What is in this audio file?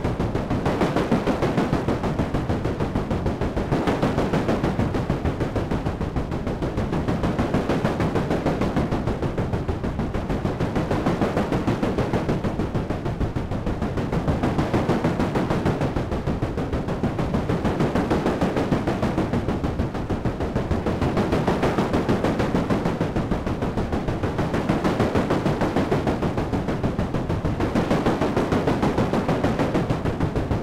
Synth (Moog Sub 37) sounding like a old train (locomotive). Looped.